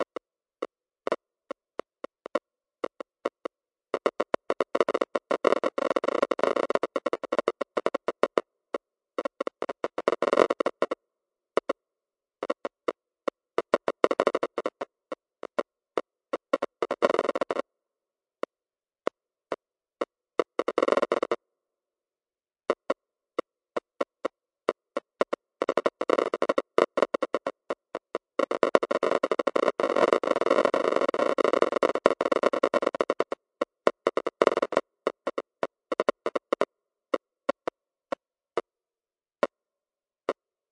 Geiger Dry
Creative Sounddesigns and Soundscapes made of my own Samples.
Sounds were manipulated and combined in very different ways.
Enjoy :)
Geigercounter, Noise, Radiation